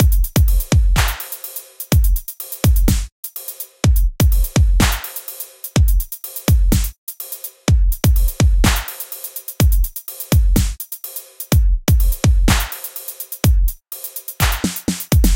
On Rd loop 9
A slow paced loops that can be used with hip-hip or dub step perhaps?
dub-step, hip-hop, On-Rd, On-road, slow, snare